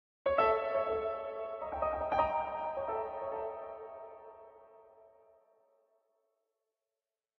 Fast phrase expressing a question mark or expectation.